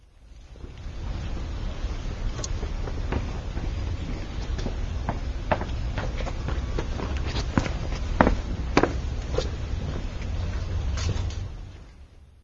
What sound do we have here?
Passos nas Escadas Serralves
This is the sound of a person's steps walking down the stairs in Serralves. This sound was recorded with our handmade binaural microphones.
stairs, steps, ulp-cam